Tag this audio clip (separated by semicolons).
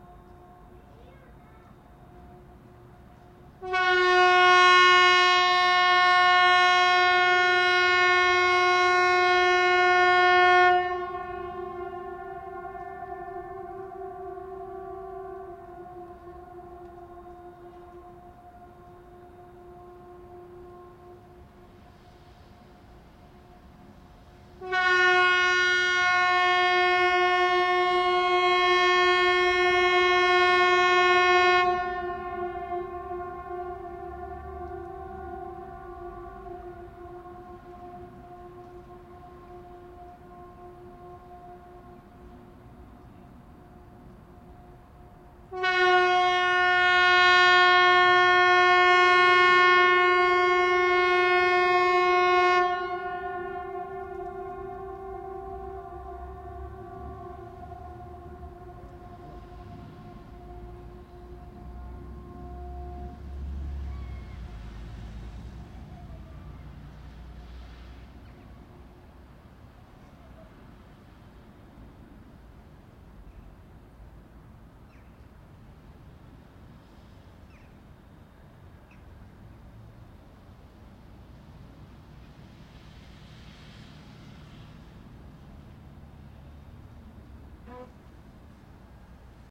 defense; emergency; fredrik; hesa; siren; warning